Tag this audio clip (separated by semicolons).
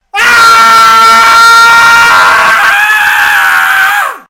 666moviescreams; Male; NT2-a; Rode; Scream; Shout; Shouting